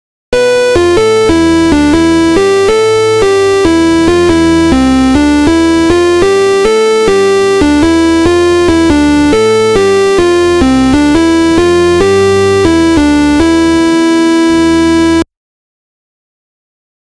Electro Synth Lead
A nice lead I made in LMMS,
electronic, keyboards, synth